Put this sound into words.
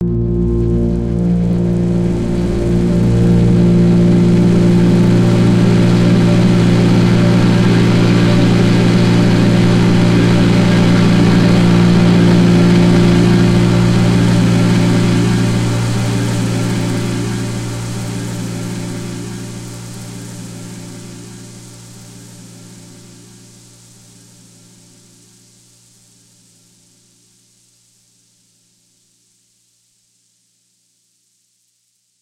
"Alone at Night" is a multisampled pad that you can load in your favorite sampler. This sound was created using both natural recordings and granular synthesis to create a deeply textured soundscape. Each file name includes the correct root note to use when imported into a sampler.